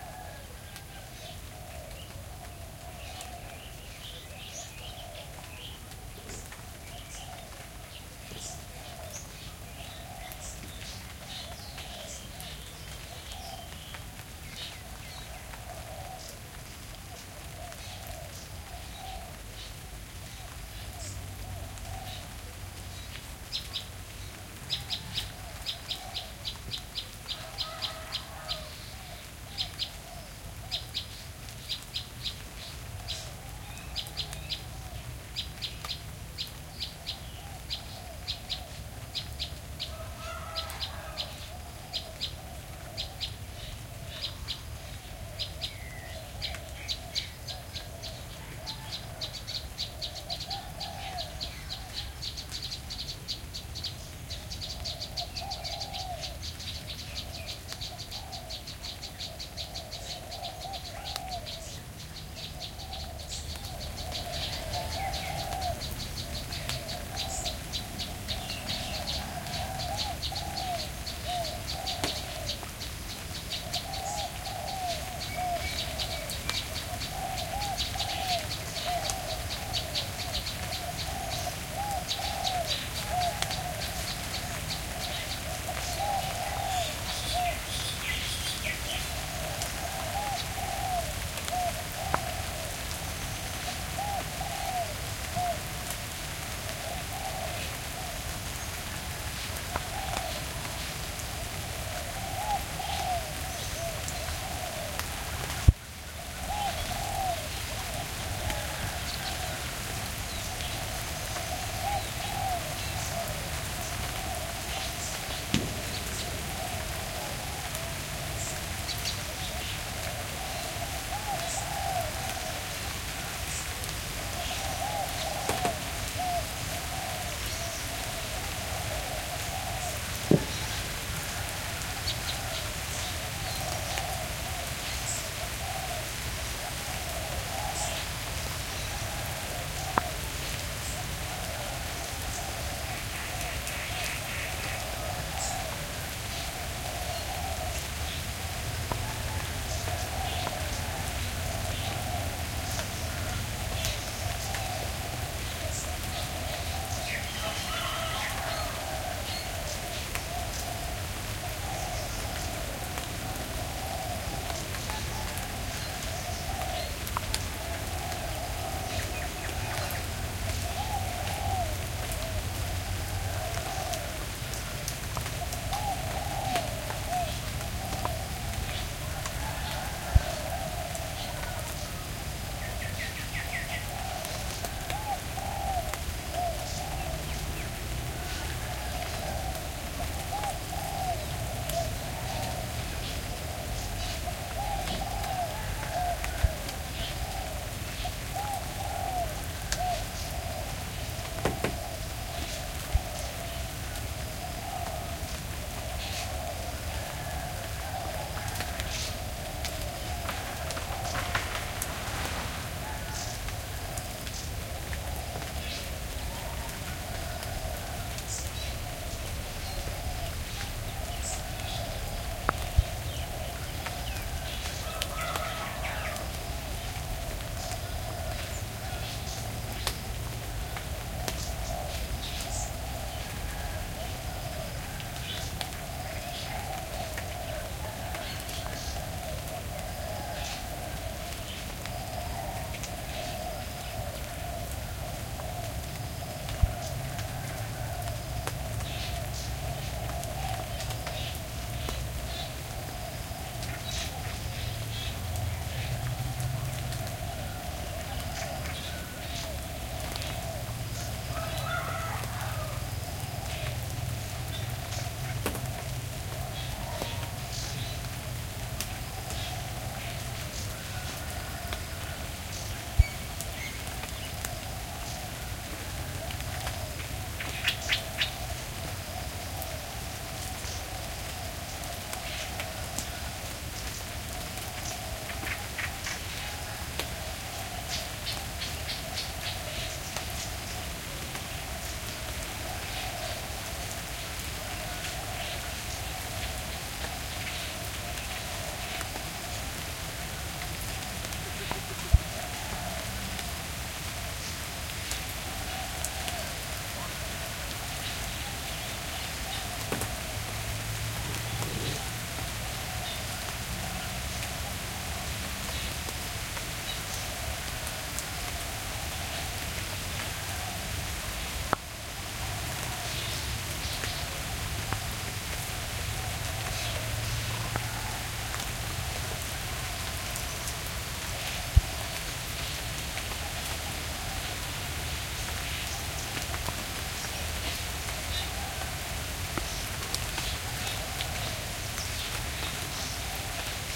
AMBRurl 10 00hrs ORTF Thailand Countryside Birds Insects Rain Alex Boyesen
Recorded ambient sound in my garden in Thailand. See file name for time of day. Recorded by Alex Boyesen from Digital Mixes based in Chiang Mai production and post production audio services.